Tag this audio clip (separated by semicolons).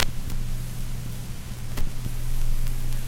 convolution; impulse; filter; response; album; surface; record; vinyl; noise; turntable